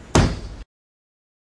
Percussion is propulsive. Here, we must figure out how to transform through sound the dead weight of the present into an explosion with enough energy to exit from life under capitalism.
Hahn Kick #2 was recorded in Hahn Student Services at UCSC with a Tascam Dr100.
bass, drum-kits, field-recordings, kick-drum, sample-pack